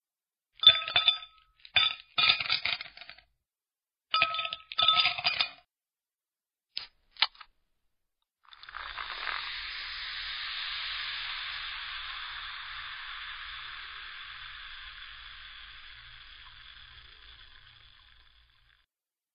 Soda on ice
Ice cubes in a glass then a can of soda being opened and then poured on ice cubes.
can, cubes, glass, ice, soda, tinkling